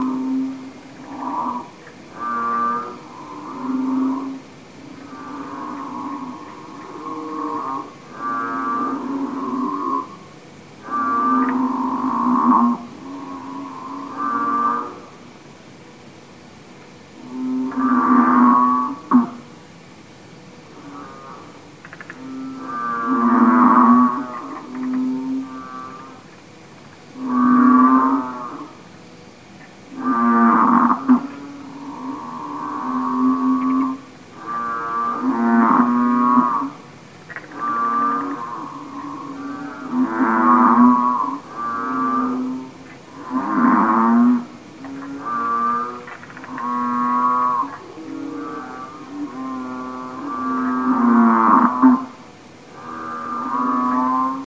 Bullfrog orchestra
a few bullfrogs singing together in a carpark in HK.
night, Rana-catesbeiana